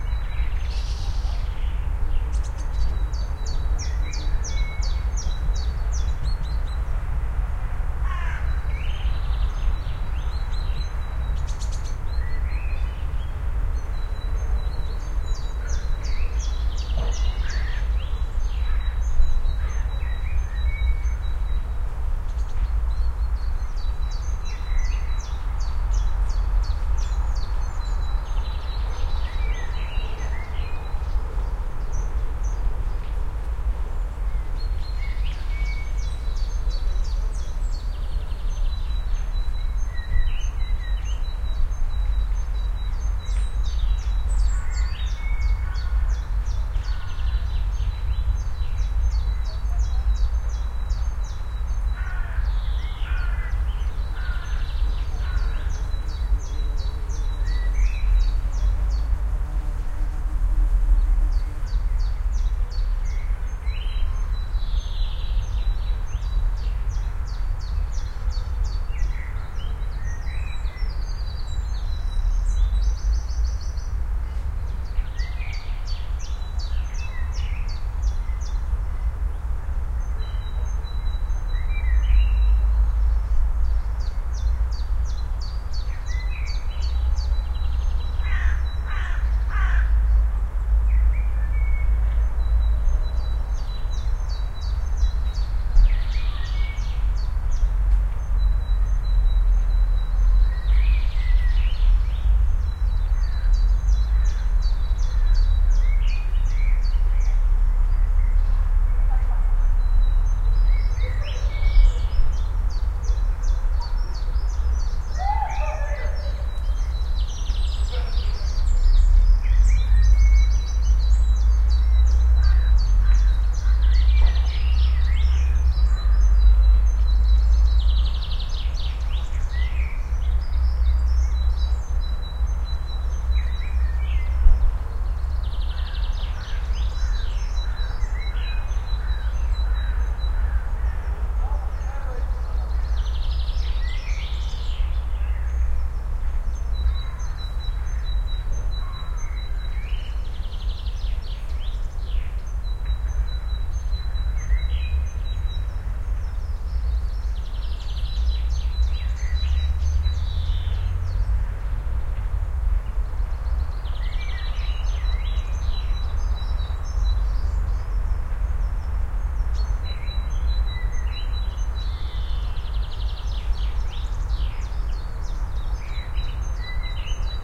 ...well, the title says it all...not very exciting...but I uploaded the track anyway, as the location and the wonderfull spring weather were just right. Shure WL183 microphones, FEL preamp into Olympus LS-10.